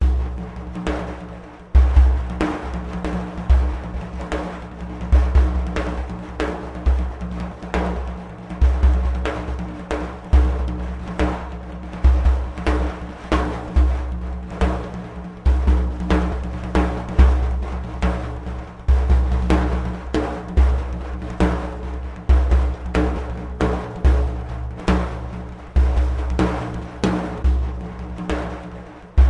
binaire rapide 140
4/4 fast daf rythm with rode NT4 mic, presonus preamp
daf, drums, frame, odd, orient